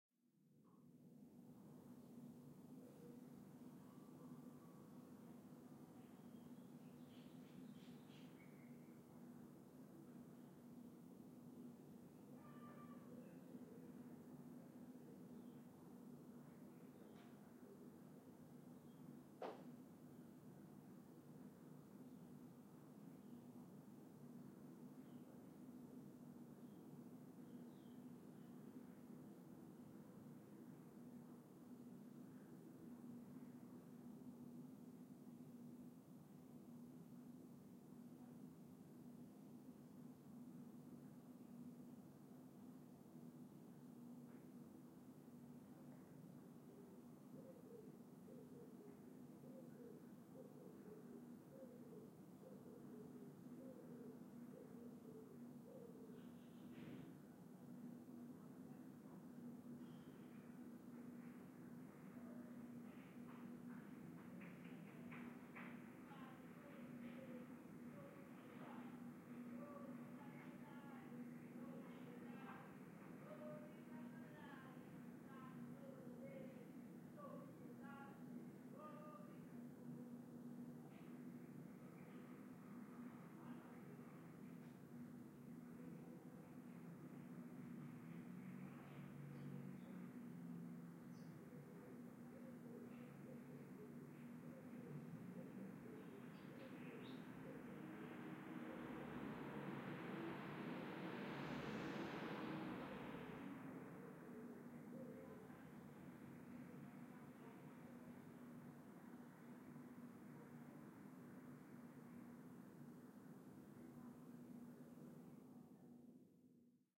Surround Room Tone (soft)
Surround recording of room tone in my living room. Very quiet afternoon, not much in the form of noise in the house. Some noise outside (people walking past, car driving past.)
Recorded using double MS (MMS) using two Sennheiser MKH40 mics and a MKH30 as shared Side into two Zoom H4n recorders. Decoded as standard 5.1 (L R C LFE Ls Rs), but you can also use either stereo pair as a stereo ambience.
lounge, suburban, room, room-tone, tone, background